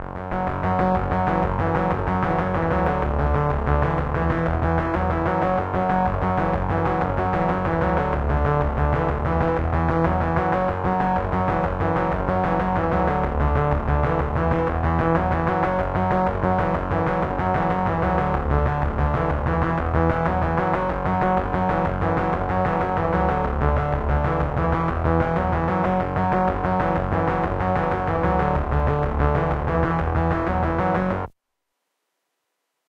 stretch bass
A synth arpeggio bassline loop with delay added. The chord structure is F#m, Dm, Em.
arpeggio, bassline, delay, loop, minor, music, synth, synthesizer